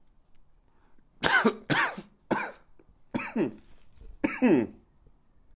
Man coughing from being sick or something in his airpipe